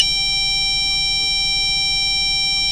Quick Tune electronic guitar tuner made in china recorded with a cheap Radio Shack clipon condenser mic. G.